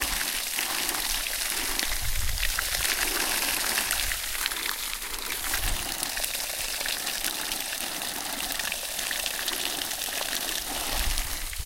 Water Pouring Onto Stone
A small stream flowing off a 2 foot drop.
pouring, river, splash, stone, stream, Water